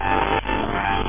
19jul2014 2312 Mellody
A distorted mellody picked up using the Twente university online radio receiver.
am, dare-28, distorted, mellody, radio, short-wave, shortwave, Twente